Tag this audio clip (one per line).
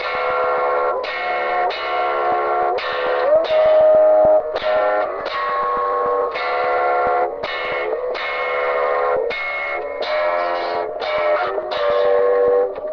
lo-fi
guitar